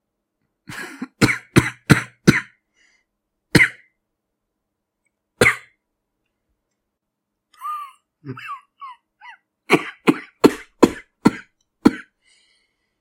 Wounded coughing
a moan for your hurt person needs
dying, groan, moan, wound